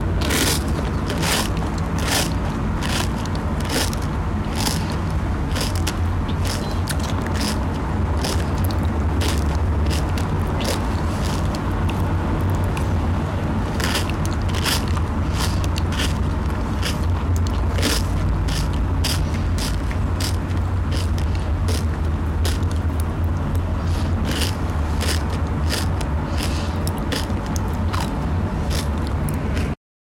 Transformacion-Excitado
distorted sound from the original, excited
distorted, noise, experimental